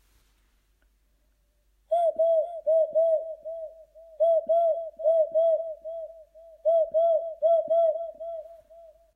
llamada vanessa v1
cell, message, call, ring, cellphone, phone, UEM, alert, mobile